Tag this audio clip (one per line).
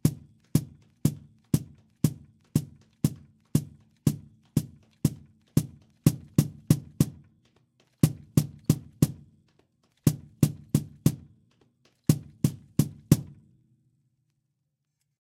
bass
beat
cajon
drum
loop
oriental
percussion
rhythm